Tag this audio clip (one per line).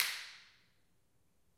snap
clap
hit
reverb
echo